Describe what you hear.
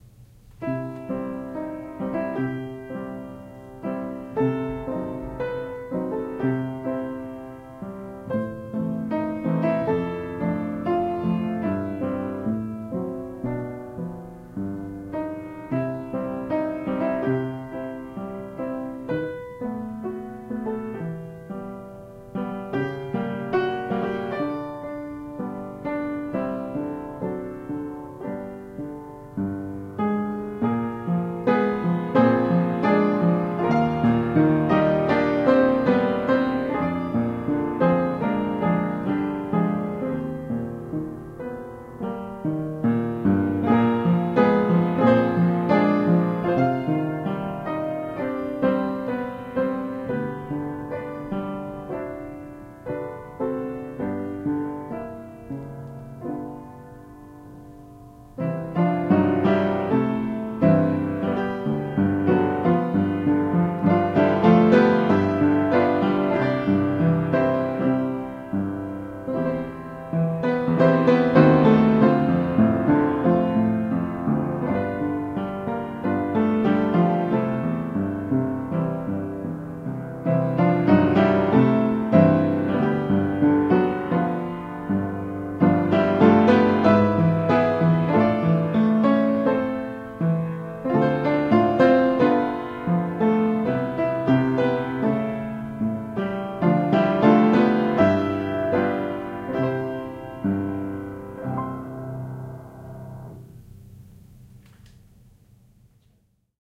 Music from "Sam Fox Moving Picture Music Volume 1" by J.S. Zamecnik (1913). Played on a Hamilton Vertical - Recorded with a Sony ECM-99 stereo microphone to SonyMD (MZ-N707)